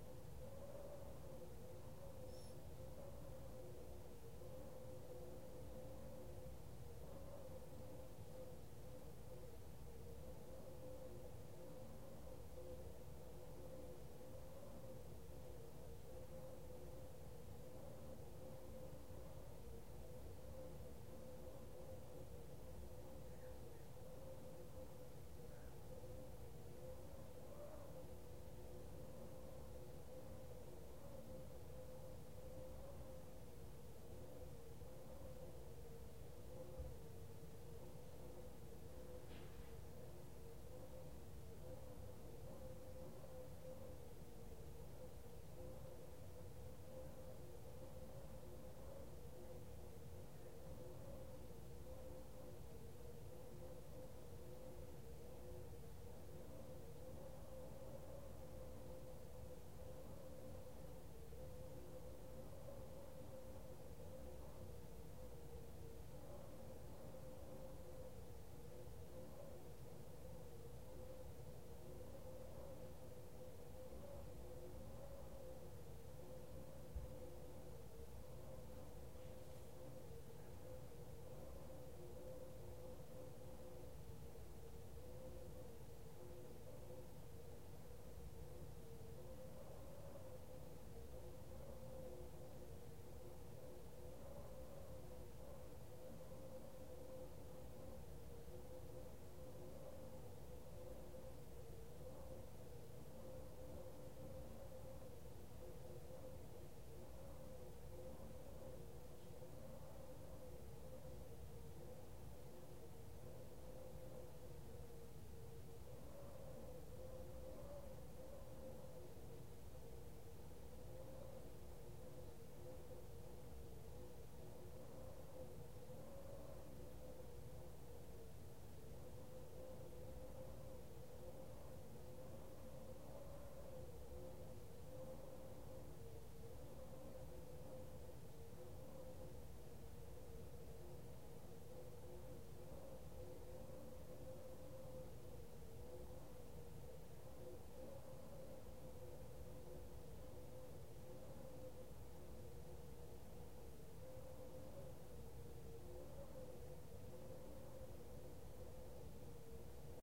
Room sound recording.